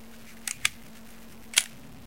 gun reload-C
A gun-reloading sound, probably a pistol.